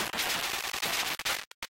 A few sample cuts from my song The Man (totally processed)